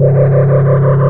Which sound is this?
MOD TRUCK D
Korg Polsix with a bad chip